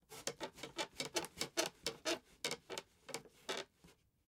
Bed Creaking; Near
Bed frame creaking.